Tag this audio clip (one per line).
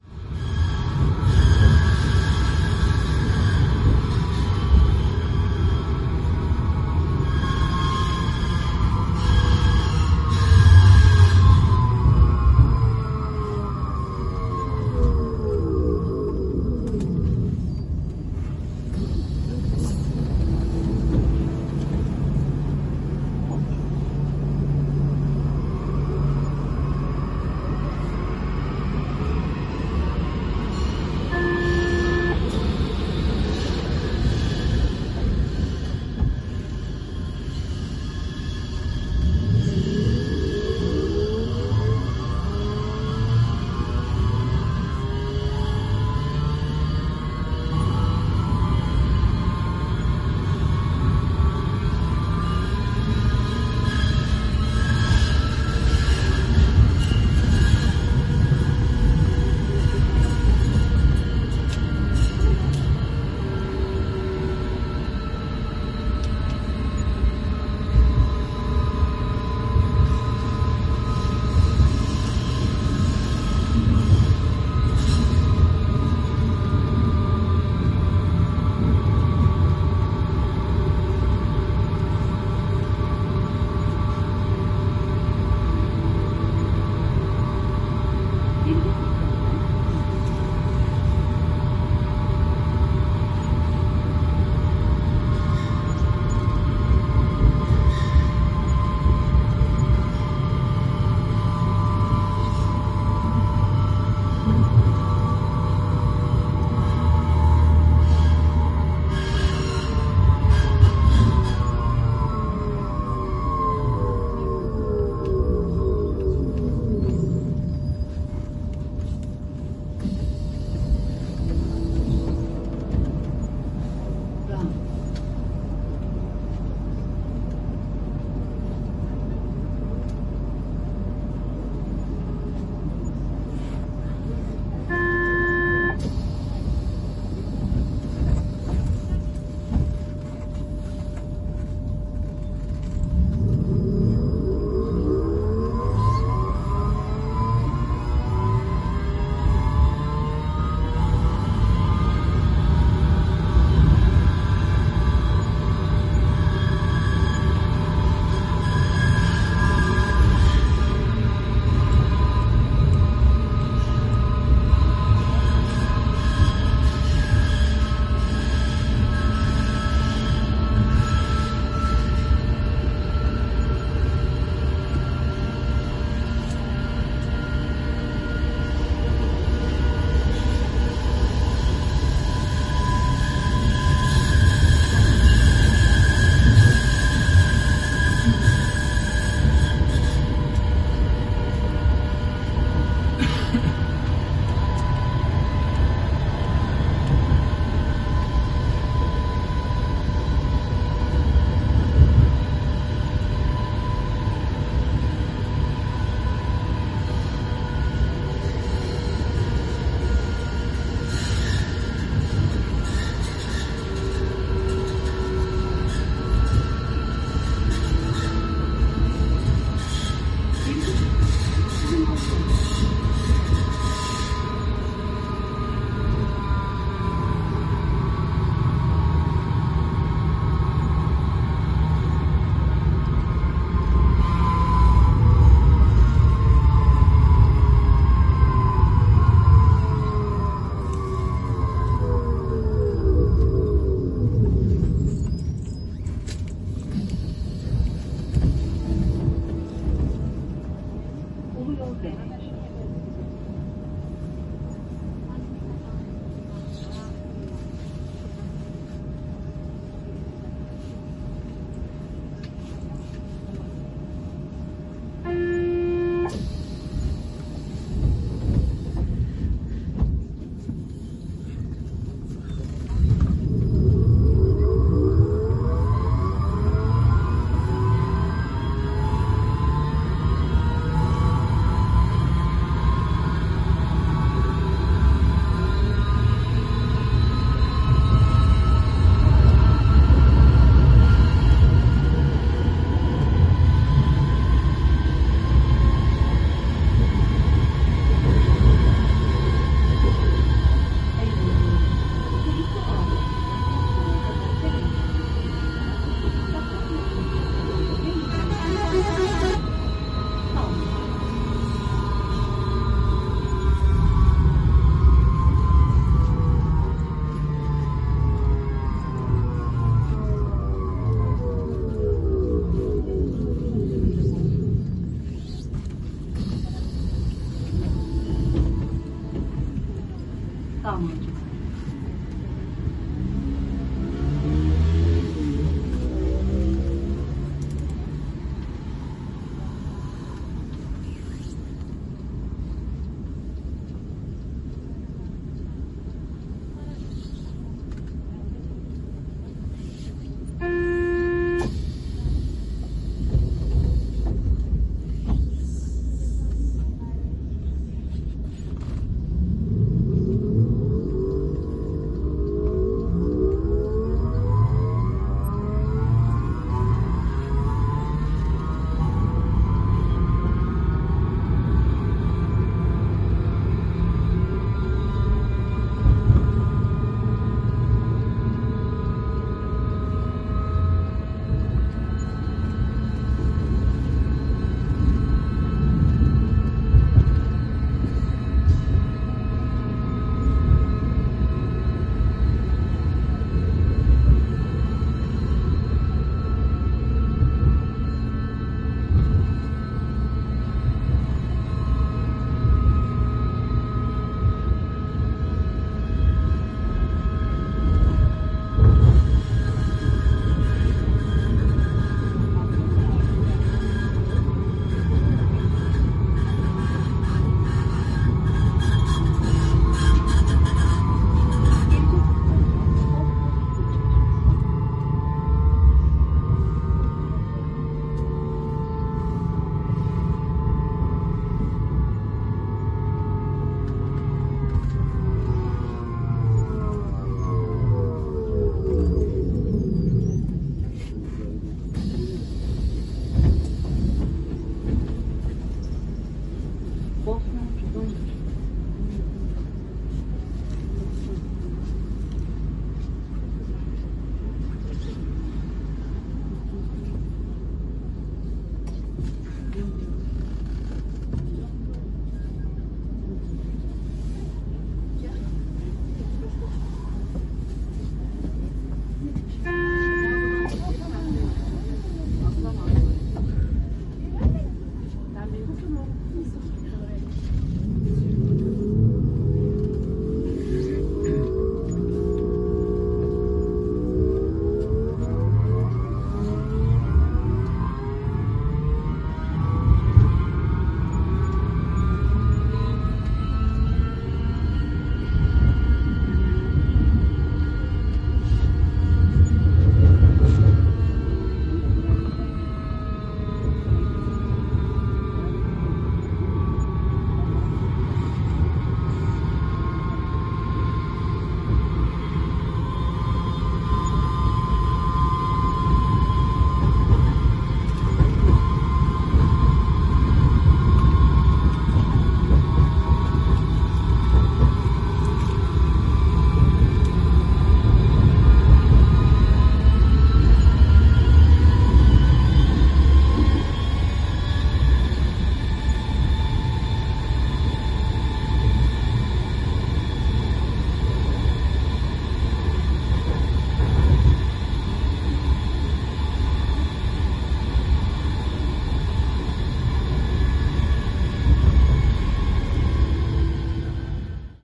doors-opening
stop-announcements
doors-closing
passengers
Tram
field-recording
Turkish-announcements